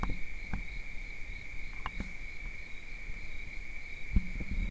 This recording was done at Trampa canyon in the Carmel valley around 11pm. There are mostly cricket noises, a few planes fly overhead and there is a faint owl call between 7 -10 minutes in. After 10 minutes the crickets get louder and more distinct.